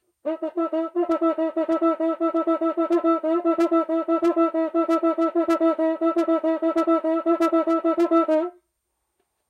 Different examples of a samba batucada instrument, making typical sqeaking sounds. Marantz PMD 671, OKM binaural or Vivanco EM35.
brazil, drum, groove, pattern, percussion, rhythm, samba